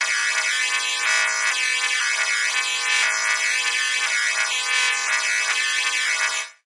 eventsounds4 - Distorted Alarm HighPass
bleep, blip, bootup, click, clicks, event, game, intros, startup